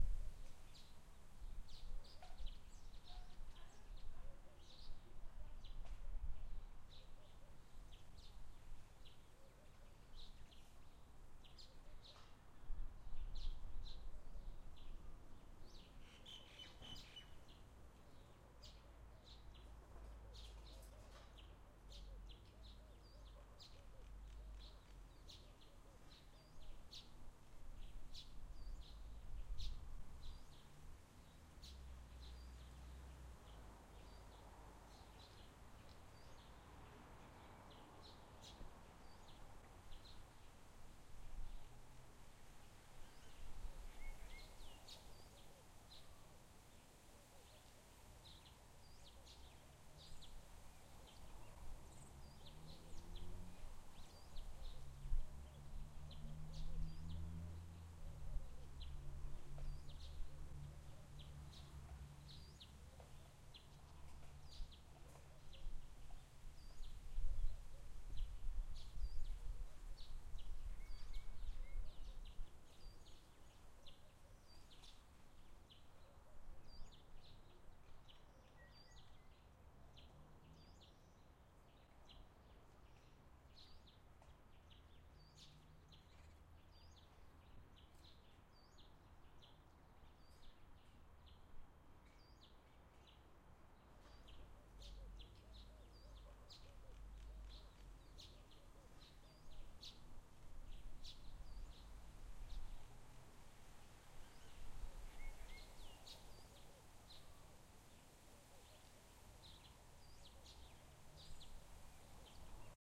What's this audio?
garden ambience
ambient sounds of a garden in the highveld of Gauteng with trees and birds including a gentle breeze
recorded with a zoom h6 stereo capsule